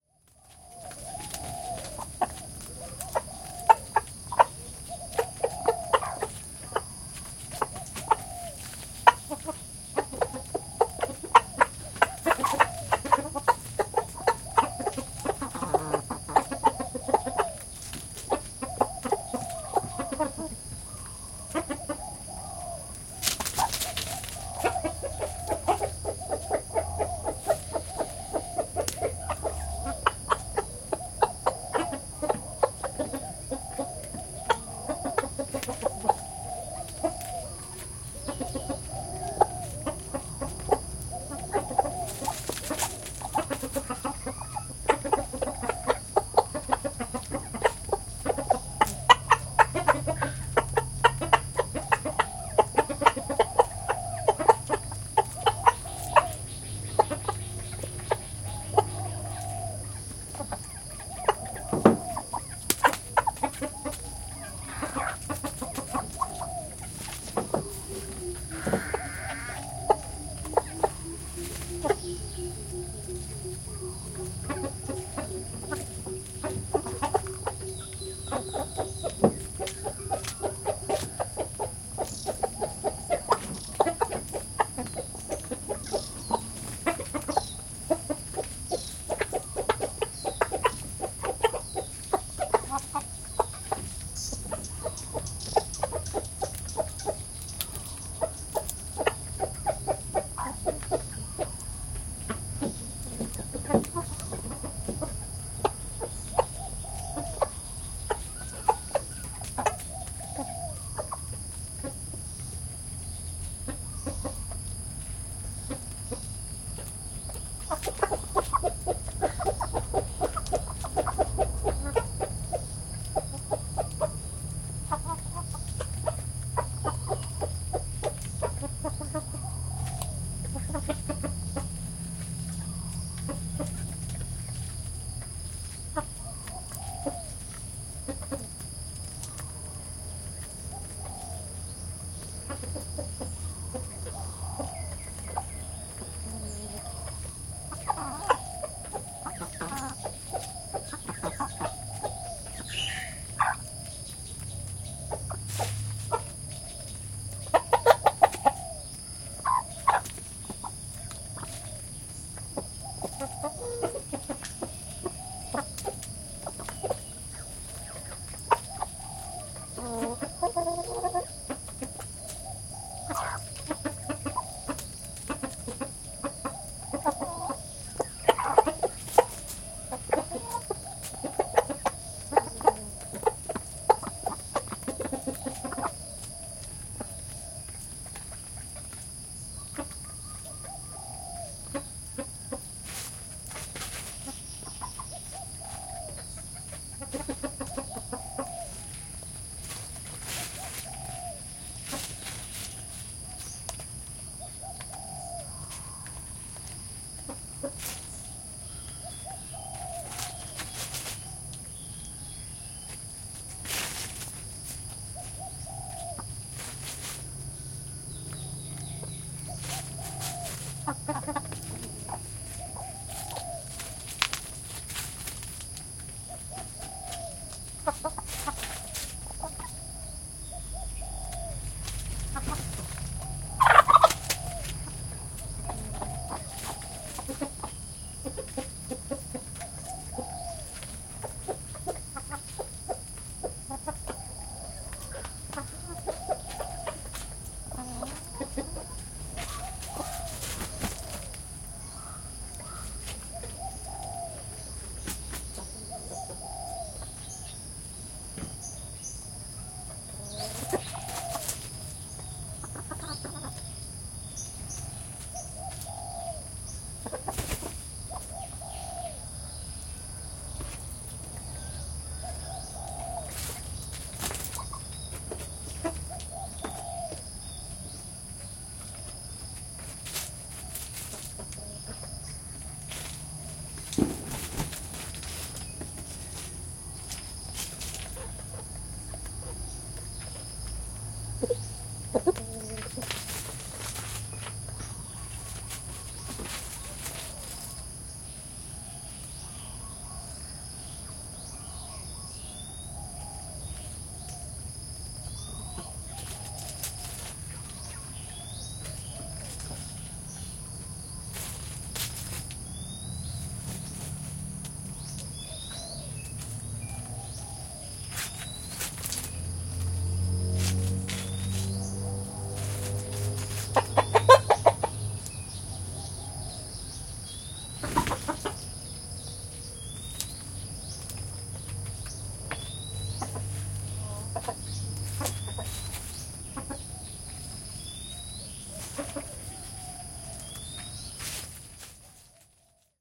AMBRurl 9.30hrs ORTF Thailand Countryside Chickens Alex Boyesen
Recorded ambient sound in my garden in Thailand. See file name for time of day. Recorded by Alex Boyesen from Digital Mixes based in Chiang Mai production and post production audio services.